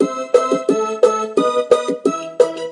réglage de la hauteur, changement de la vitesse